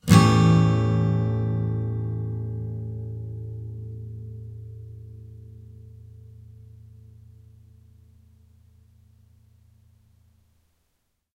Yamaha acoustic guitar strum with medium metal pick. Barely processed in Cool Edit 96. First batch of A chords. Filename indicates chord. Recorded with my cheapo microphone for that vintage warmth...
a7, acoustic, free, sound, yamaha, sample, guitar